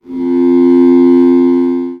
////////// Made using Audacity (only) :
Generate 2 wave forms
Sine - 261,6 Hz
Sine - 261,6 Hz
Increased the height of the second wave form from F to A#/Bb
Mixed them on one track
Normalized the track to -0.10 dB
Applied GVerb
Roomsize = 75,75 / Reverb time = 7,575 / Damping = 0,5 / Input Bandwidth = 0,75 / Dry signal level (dB) = -70,0 / Early reflection level (dB) = 0,0 / Tail level (dB) = -17,5
Re-normalized the track to -0,10 dB
Applied long fade in and long fade out
Amplified the track to 13,7 dB
////// Typologie : Continue tonique (N)
////// Morphologie :
Masse : groupe de sons tonique amplifiés par l'écho
Timbre : Grave, Terne, Résonnant, Puissant
Grain : Rugueux
Allure : Pas de vibrato mais un écho
Attaque : Douce et Graduelle grace au fondu
Profil mélodique : une seule hauteur
Profil de masse / calibre : sons égalisés
bass, boat, Gverb